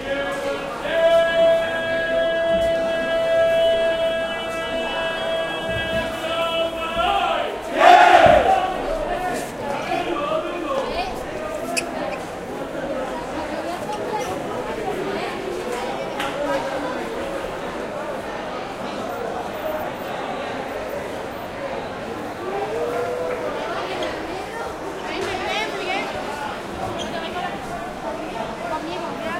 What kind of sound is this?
shouts and ambient noise at the Sanlucar de Barrameda market, in S Spain. PCM M10 with internal mics
ambiance, field-recording, spanish, voice, market